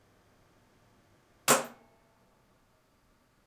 rotary swich relay
Stereo recording of a rotary on/off switch in the boiler room connected to some relay (double click heard). Very decent quiet noise of the boiler room in background. Recorded from approx. 1,5m. Recorded with Sony PCM-D50, built-in mics, X-Y position.